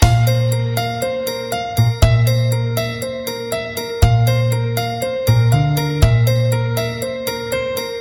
Loop Little Big Adventure 04
A music loop to be used in fast paced games with tons of action for creating an adrenaline rush and somewhat adaptive musical experience.
battle, game, gamedev, gaming, indiedev, music-loop, videogame, videogames, war